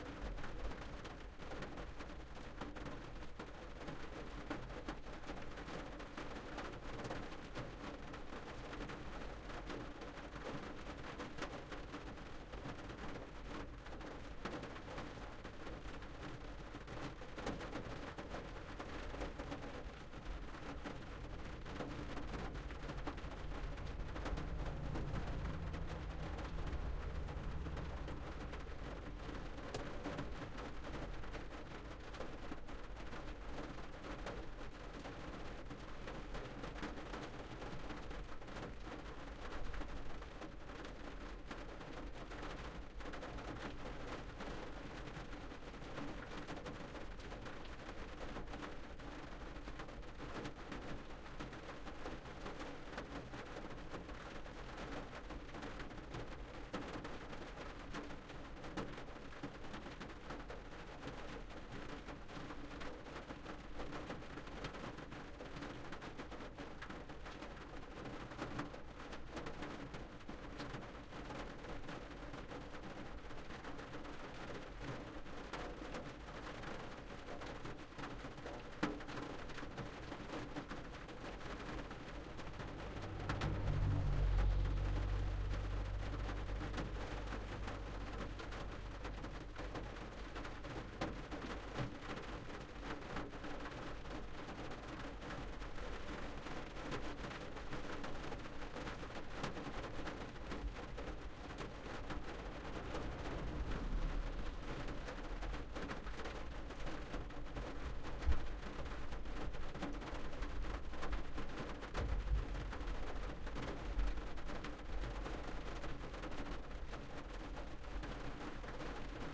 Rain falling on the roof of my Subaru wagon lightly, recorded with stereo omni's space a foot apart.
stereo, rain, ambiance, tapping, field-recording